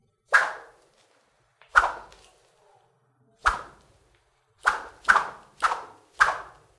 a stick (glass fiber) cuts the air